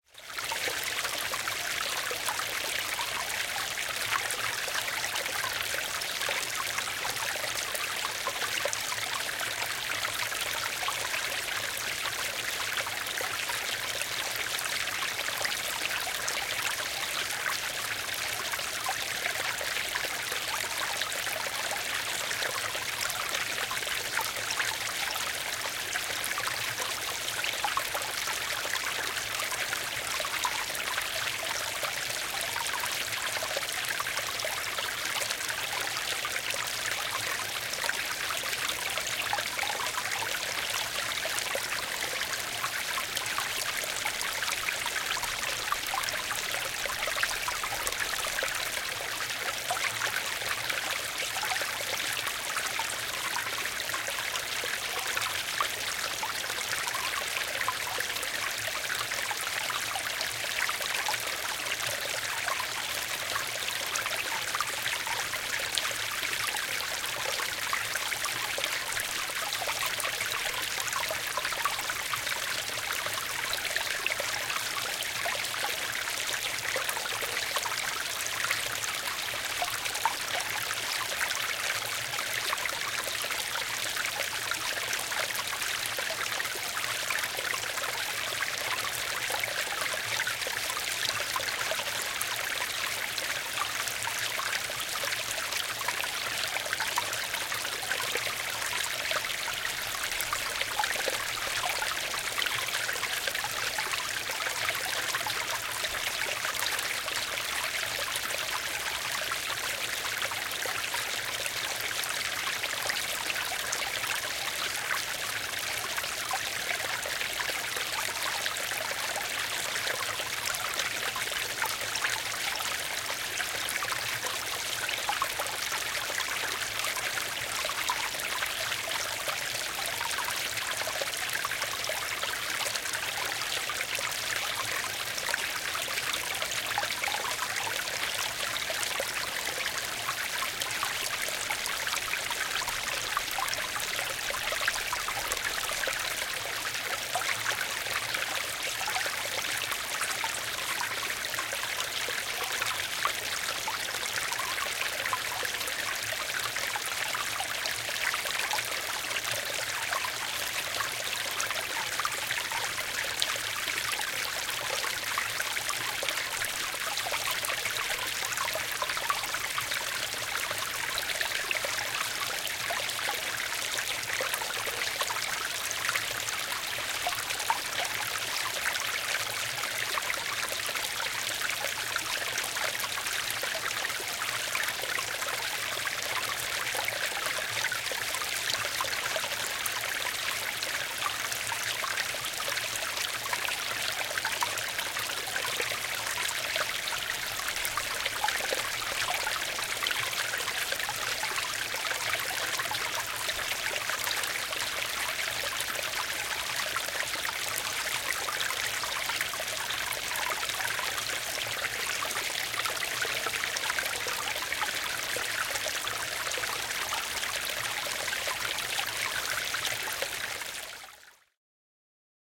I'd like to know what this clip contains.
Puro lirisee ja solisee / Small brook gurgling and babbling nearby
Pieni puro, veden kaunista solinaa ja lirinää. Lähiääni.
Paikka/Place: Suomi / Finland / Kuusamo
Aika/Date: 01.06.1995